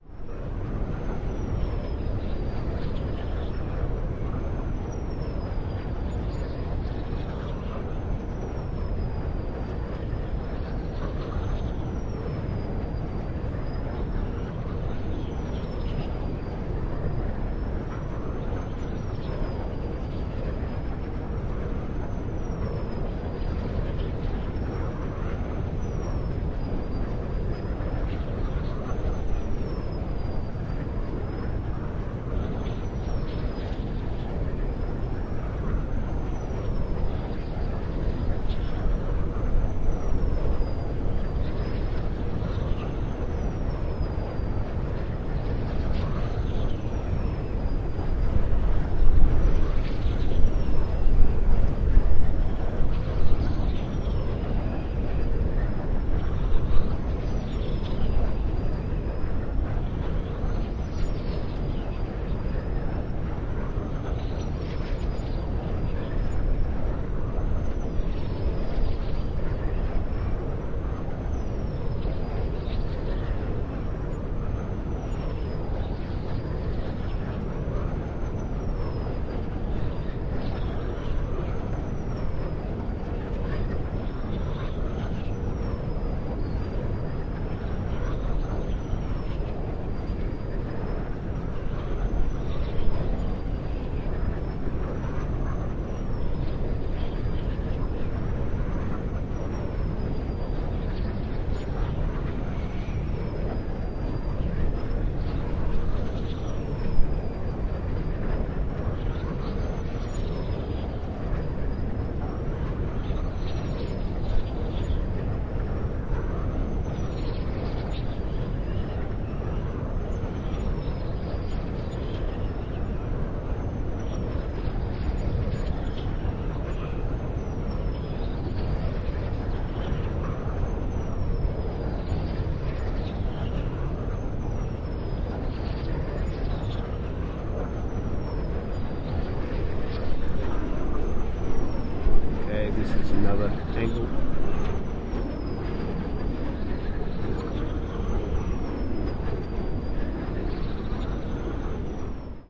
Wind Farm Whistle
This was recorded with a Rode NT4 stereo mic into my Zoom H4. I was about 50 metres between two wind turbines. It wasn’t a particularly strong wind, there was a high pitched whistle coming from one turbine. I returned the following day to similar wind conditions but the whistle was no longer present.